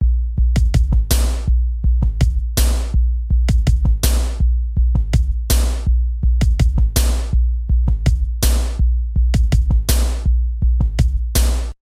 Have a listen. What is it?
Drum loop 1
Tempo is 82. Used these in a personal project. Made with CausticOSX.
rhythm, dubstep, beat, drum-loop, percussion-loop, rubbish, quantized, sticks, groovy, loop, garbage, drum, percs, trance, improvised, tamax